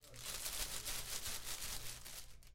41- 2sacudir tela
shaking a plastic bag